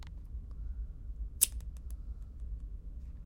Snipping some scissors
snip, scissors, scissor